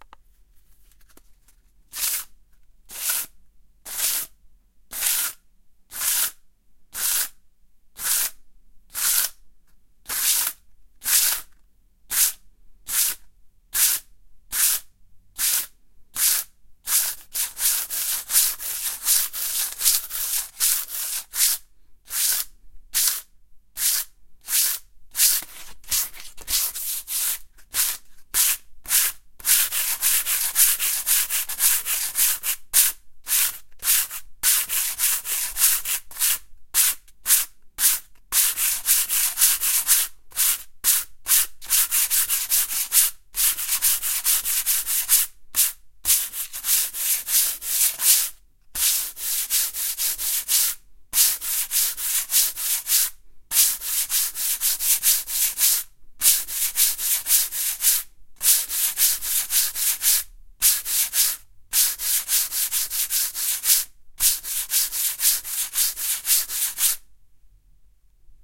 Sandpapir TBB
Using sand paper on wooden surface
sand-paper, polishing